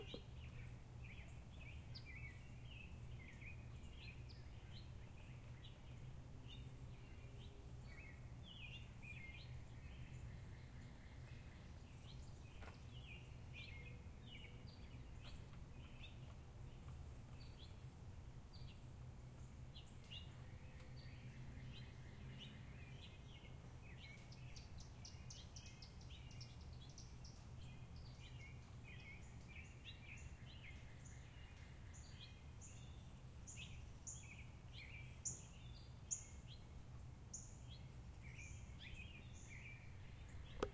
Ambience Outside the House (Birds Chirping, etc.)
Here is a field recording of some birds chirping and the like outside my house one afternoon. Essentially, outdoor nature ambience. Recorded with a Zoom H4N.
ambience, nature, outdoor